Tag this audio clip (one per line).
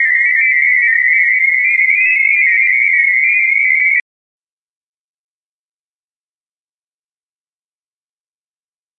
sf
space-ships
outerspace
alien